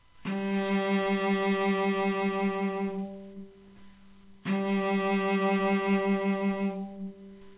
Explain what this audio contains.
8 cello G3 2notes
A real cello playing the note, G3 (3rd octave on a keyboard). Eighth note in a C chromatic scale. All notes in the scale are available in this pack. Notes played by a real cello can be used in editing software to make your own music.
There are some rattles and background noise. I'm still trying to work out how to get the best recording sound quality.
G, stringed-instrument, string, instrument, cello, scale, violoncello